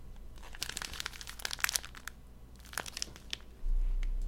crinkling an unopened candy wrapper